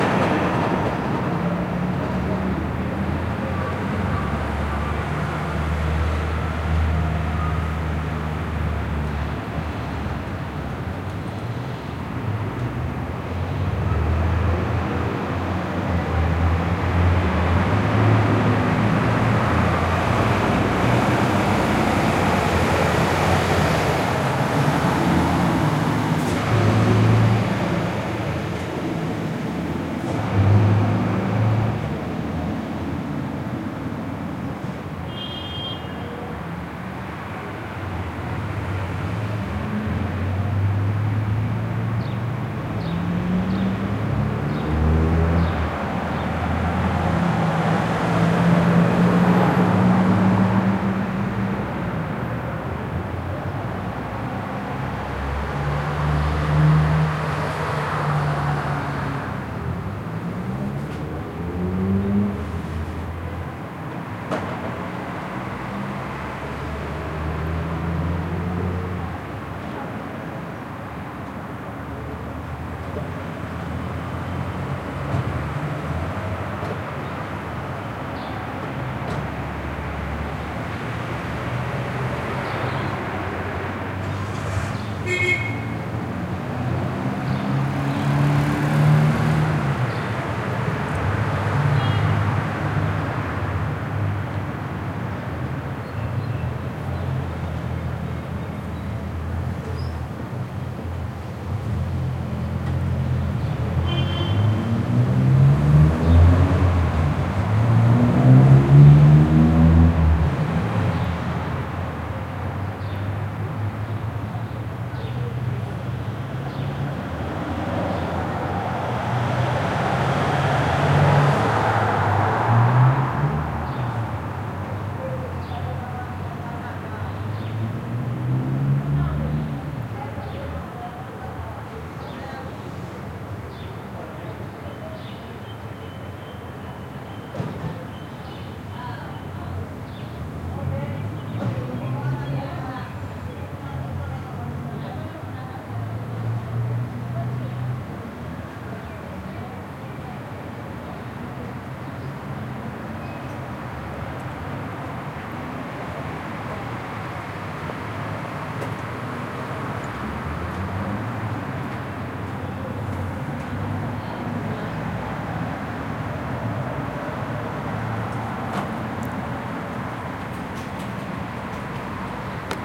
This was recorded in a mining town in South Africa. You can hear cars and trucks passing by, recorded in the city center with a zoom h4n pro